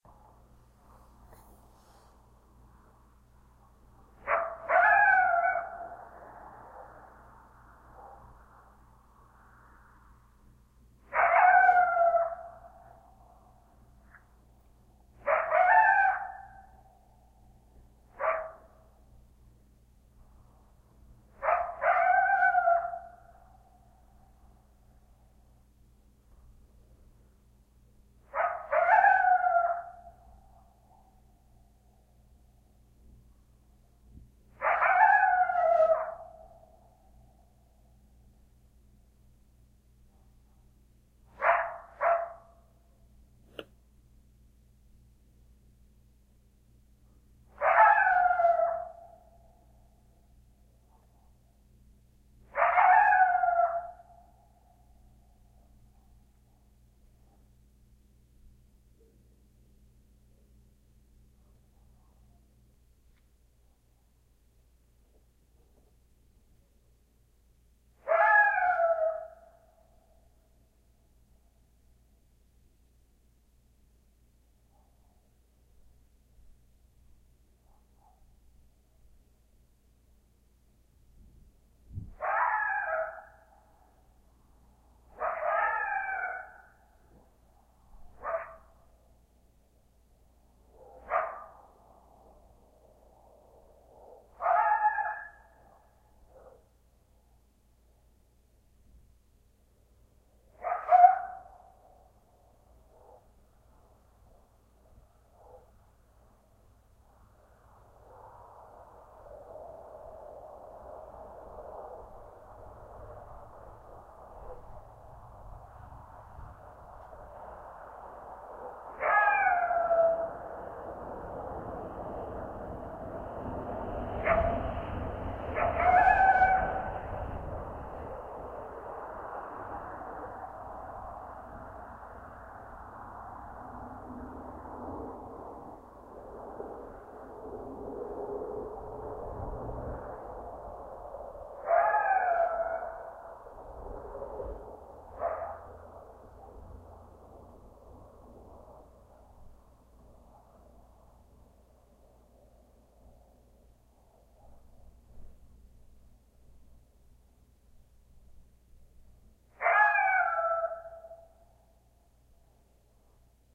Early one cold December morning, this coyote woke me. This recording is the third and final taken, and was recorded from the front door, facing the hill from which the coyote sang. Immediately after this recording, some dogs chased the coyote away. Amplified and edited for noise in Audacity.